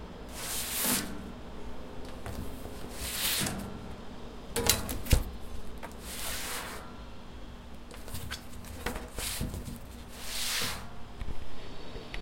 Sliding Hard Folder
A hard folder sliding.
Recorded using TASCAM DR-40 Linear PCM Recorder